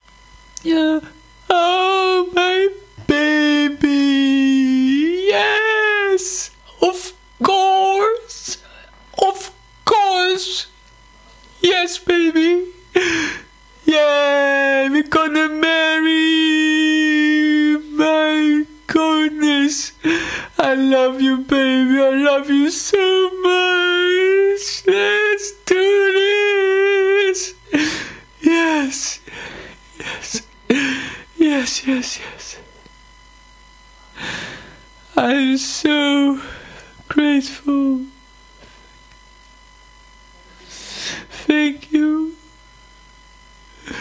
Reaction of being asked for marriage - wedding vocal
asked, fake, romantic, emotion, wedding, marriage, talking, lucky, reaction, crying, happy, emotional, cry, weeping, being, sobbing, vocal